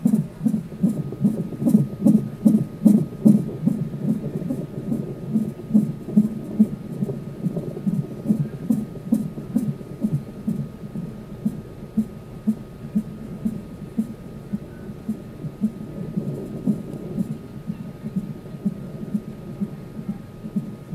baby heartbeat 0414 9
Delivery room on birth day recorded with DS-40.